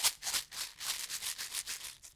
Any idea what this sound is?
essen mysounds sulan
a plastic box in tin foil with toy pistole rounds ratteling